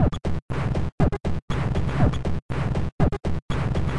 NoizDumpster Beats 07
I have used a VST instrument called NoizDumpster, by The Lower Rhythm.
You can find it here:
I have recorded the results of a few sessions of insane noise creation in Ableton Live. Cut up some interesting sounds and sequenced them using Reason's built in drum machine to create the rhythms on this pack.
This rhythm uses no effects (except EQ).
120-bpm, loop, noise, noise-music, NoizDumpster, percussion, rhythm, synth-drums, TheLowerRhythm, TLR, VST